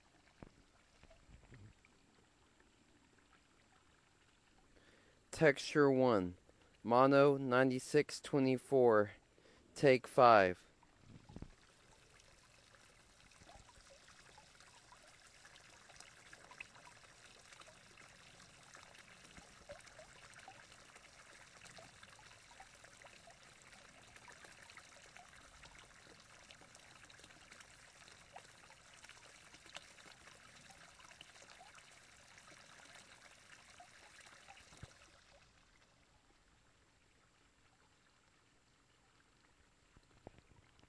08 JOSH O-TEXTURE 1
Tiny but lovely waterfall
waterfall, texture, recording, Field